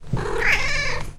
pucky meow 01
Our cat Puk/Pucky meows. A very short sample. Recorded with a Zoom H2 recorder.
meow, animal, cat, normalized, purr